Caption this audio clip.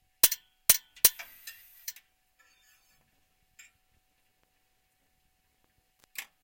Queneau machine à repasser 03
machine a repasser industrielle
industrial, iron, machine, machinery, POWER